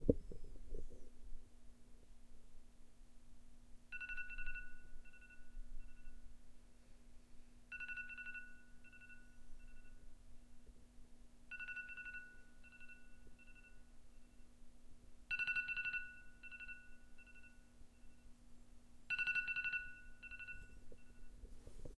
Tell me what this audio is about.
The alarm sound of an iphone
alarm, field-recording, iphone